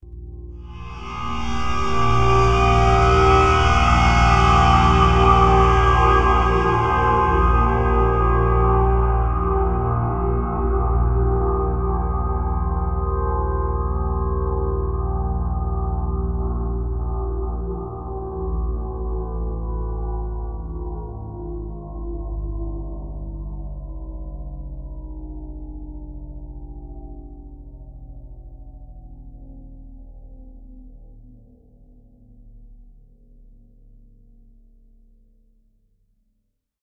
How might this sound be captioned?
ambient metallic drone/soundscape with descent